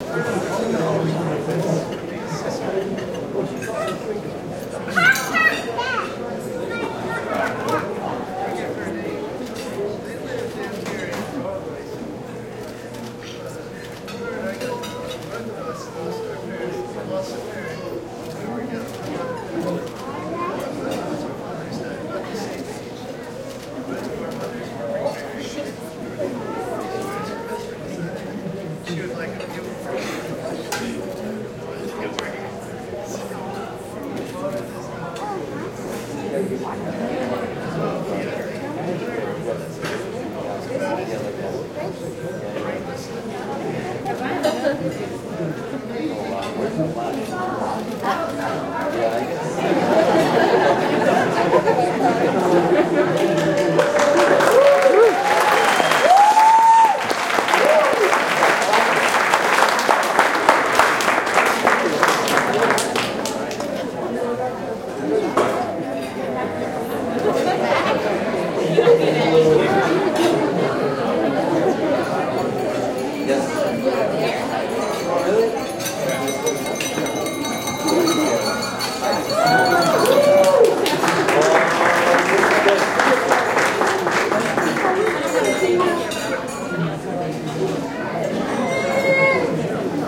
Wedding Cutting the Cake Utensils on Glasses
This was recorded at a wedding and celebration party afterward. Utensils hitting on glass and the cheer. Several hundred people talking in a very large room. The one is of with the cutting of the cake. It should be random enough to be used for most any situation where one needs ambient crowd noise. This was recorded directly from the on board mic of a full hd camera that uses Acvhd. What you are listening to was rendered off at 48hz and 16 bits.
talking, crowd, people, noise